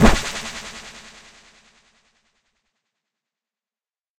microphone + VST plugins
effect, sfx, sound, fx